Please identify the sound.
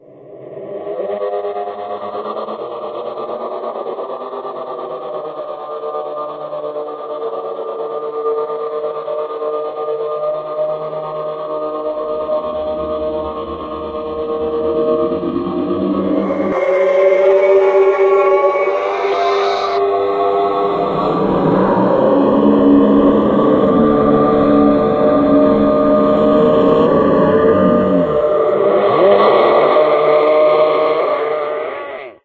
terrifying palpitations of an ongoing organic reconfiguration of hell